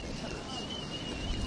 newjersey OC kiteloop
Loopable snippets of boardwalk and various other Ocean City noises.
field-recording, loop, ocean-city